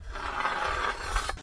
Metal Scrape
Dragging a metal axe across a long, rusty piece of metal.
steel, metal, stroke, drag